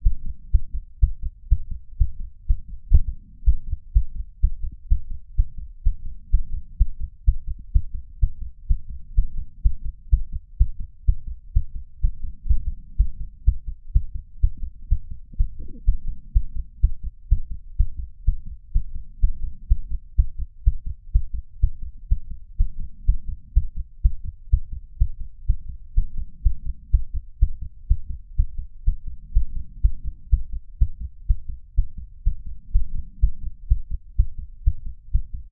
Skipping heartbeat
Beating heart of 31 year old male, skipping once while recovering from exercise. The skipped beat is significantly louder than the other beats.
Recorded with a GigaWare lapel mic and a small ceramic bowl. Recorded on December 29, 2018.
skipping, exercise, pumping, fast, skips, anatomy, beating